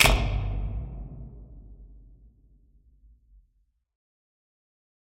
large searchlight turning on in medium outdoor space. to make effect sound closer increase low-end. 6-channel surround.